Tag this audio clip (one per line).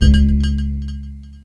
psytrance
progressive
goa